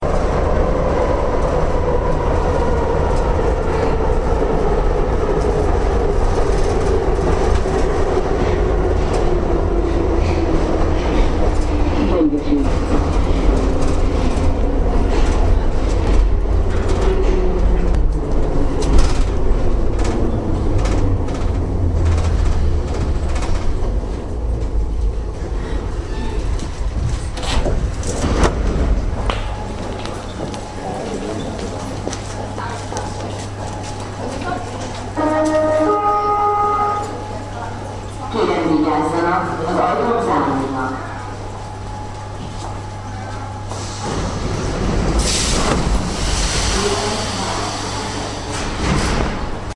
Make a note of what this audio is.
Nr 3 Underground (Capital of Hungary) 2
Sounds of Metro was recorded from the Nr 3 Underground (Capital of Hungary)
brake, roll, speed, Underground, warning-sound